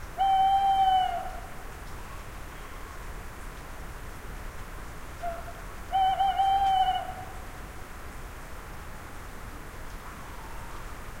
Here we go again. Early evening in bonny Scotland and our "pet" owl,
the one, who lives nearby, gave another sample of its "song". Recorded
with two Sennheiser ME 64/K6 microphones and a HHB Portadisk recorder.
owl, field-recording, scotland, ringtone, bird